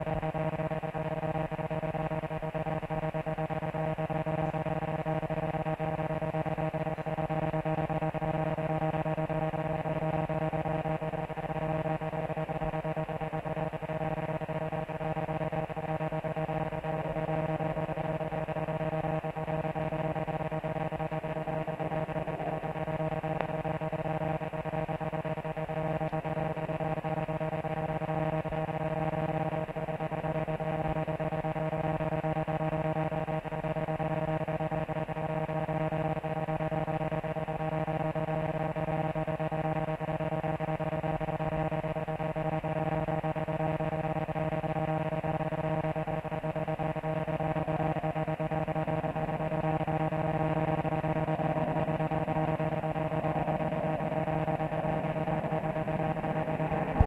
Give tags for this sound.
digital; electric; electronic; noise; radio; static; wireless

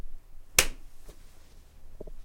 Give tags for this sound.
Funny; Noise; Slap

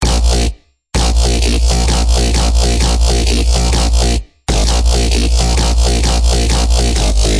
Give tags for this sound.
bass; Djzin; dubstep; electronic; fl-Studio; grind; loop; low; techno; wobble; Xin

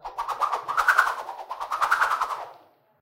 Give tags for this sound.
knife; sword

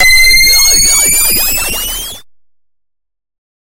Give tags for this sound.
electronic; soundeffect

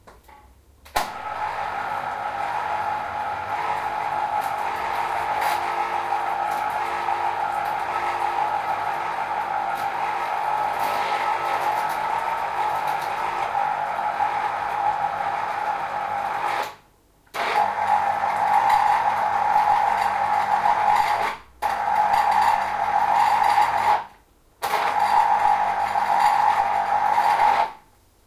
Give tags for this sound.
orange-juice; machines; juicer; field-recording

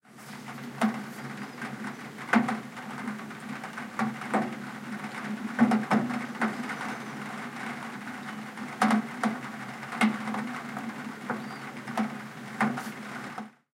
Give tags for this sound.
ambience; droplets; drops; nature; Rain; raindrops; raining; window; windowsill

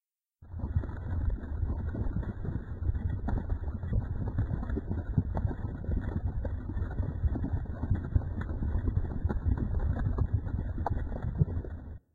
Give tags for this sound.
bubble; fire; lava; magma; volcano; warm

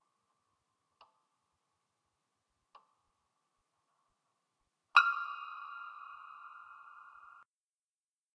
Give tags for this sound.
Inside-piano; tap; contact-mic

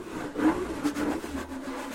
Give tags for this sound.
leather; rubbing; scraping; strange

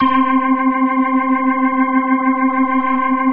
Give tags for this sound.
pitch; rock; c4; organ

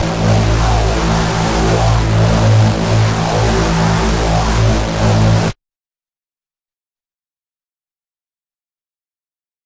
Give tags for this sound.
reese; processed; distorted; hard

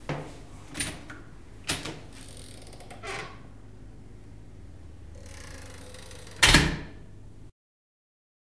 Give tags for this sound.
Open; Metal; Door; Close

